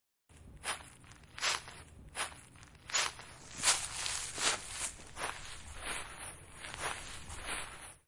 Walking through leaves
Walking (crunching) through leaves
crunch, walking